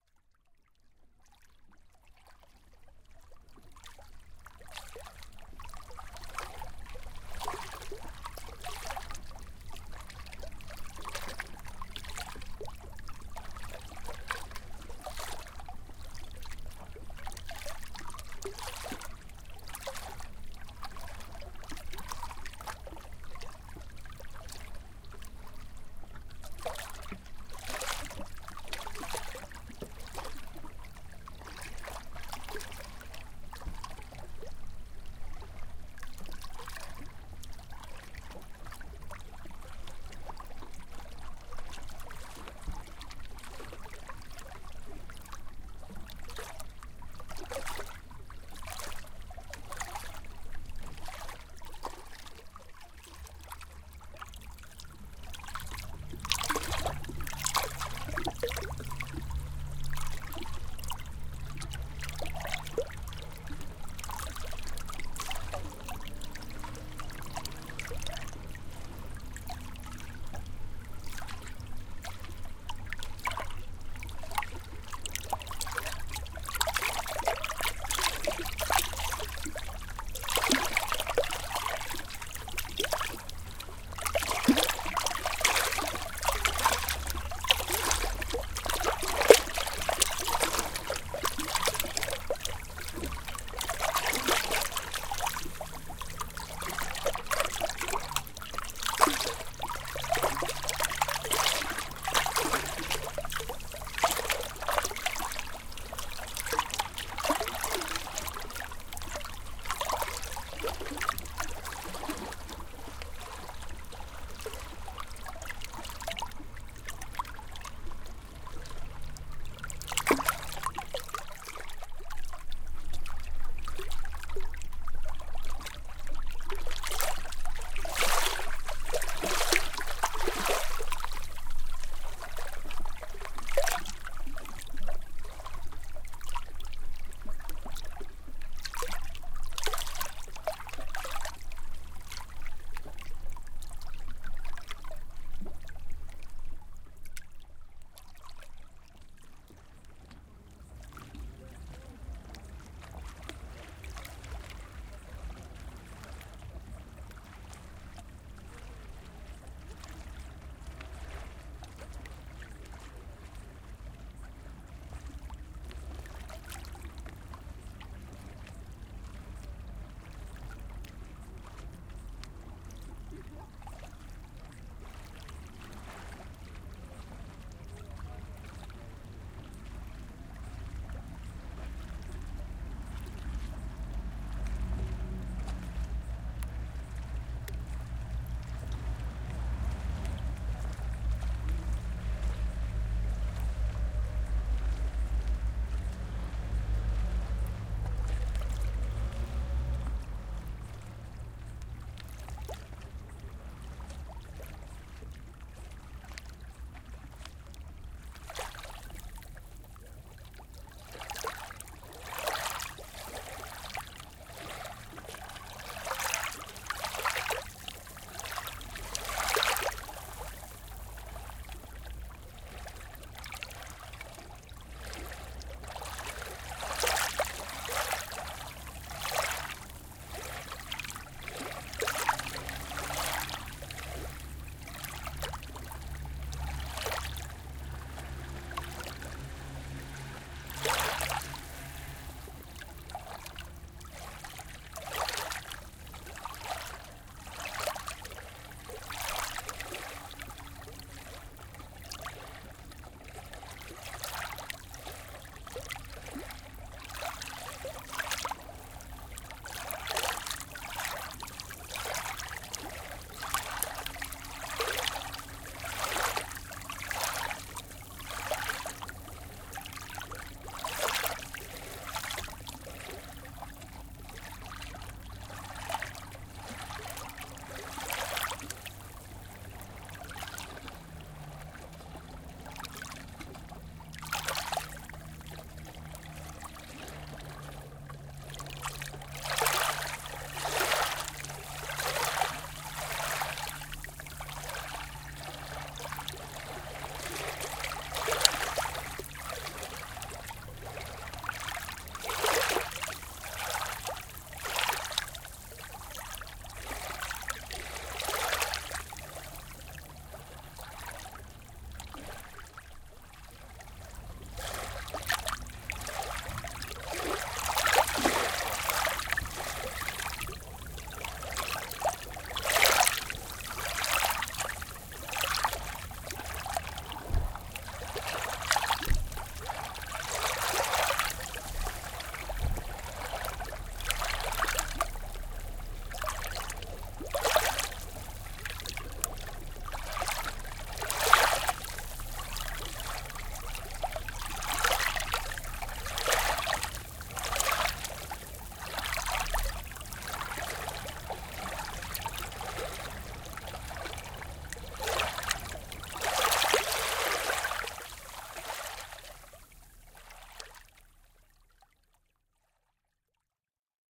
dramalj croatia beach
Recorded on the beach of Dramalj, Croatia at around midnight, on 2007-06-25. You can hear the water lashing the rocks and some city noise. This sample is made from about 7 separate recordings.
Location (recordings were made around here, but at night):
Recorded using Rode NT4 -> custom-built Green preamp -> M-Audio MicroTrack. Unprocessed.